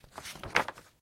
Pickup Note 03
A short clip of paper rustling to mimic the sound of a note being picked up.